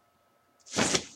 An umbrella opening fiercly.